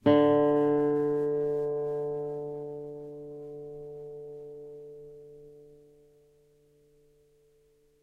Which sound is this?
D open string
open D string on a nylon strung guitar.